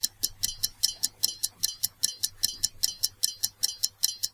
Very close recording of a stopwatch ticking, with lots of spring noise. The clock ticks at 5 ticks per second.
The watch was a rather unusual Tag Heuer device that reads in 1/100ths of a minute rather than seconds.